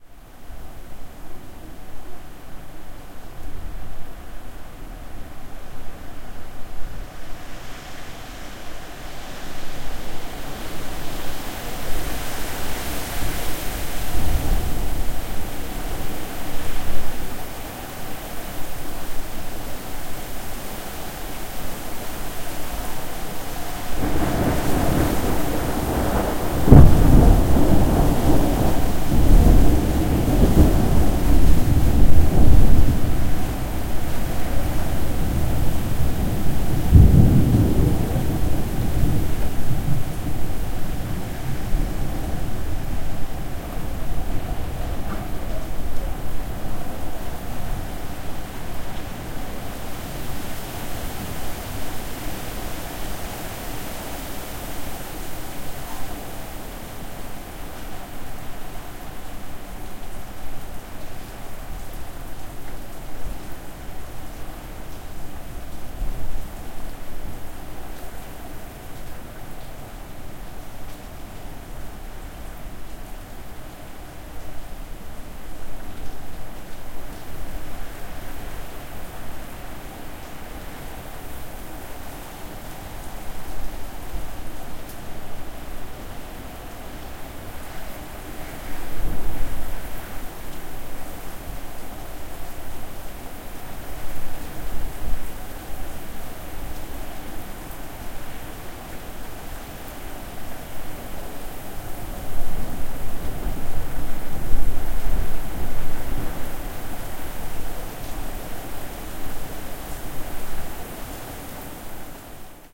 Storm coming l
clouds, field-recording, previous, rain, rainstorm, storm, weather, wind